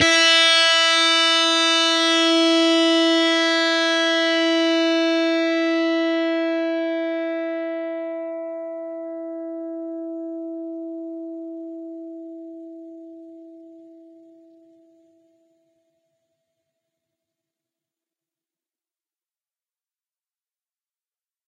Dist sng E 1st str
E (1st) string.
distorted, single, strings